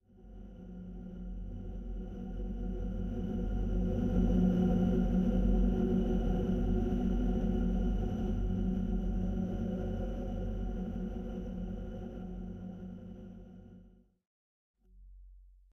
low wind string
This sound were made by recording the feet of a tightrope artist rubbing against the wire. (AKG contact mic coming directly into a MAX/MSP patch. Other sounds to be added to the pack are physical models with the dimensions of a 7 meter tightrope exited by other impulse samples.
ambient, chorus, medium, tightrope, wind